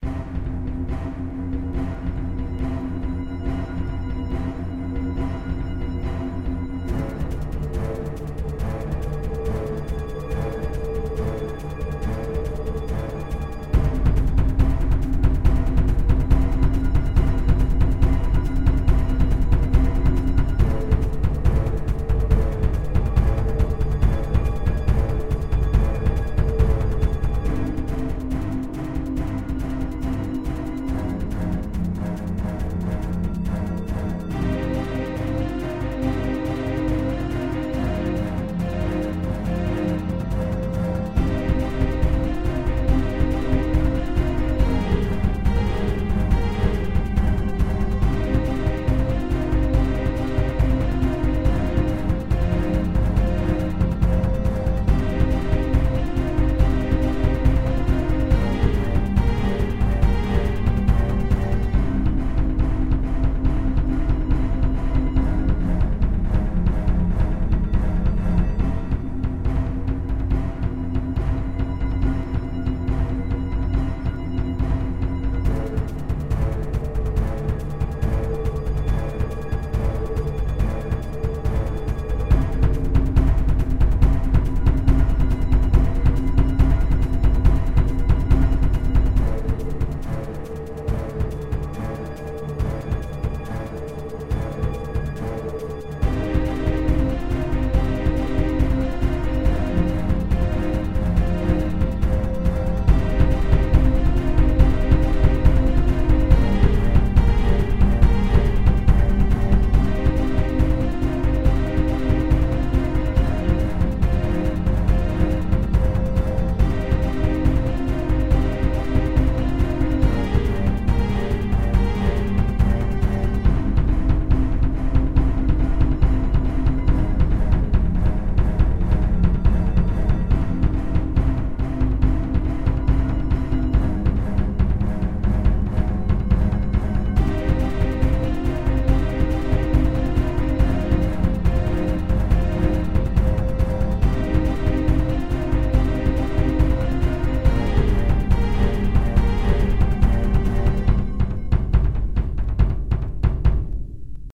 Eruption (Cinematic Music)
Made 100% on LMMS Studio.
Instruments:
Drums
Strings
Pad
Synth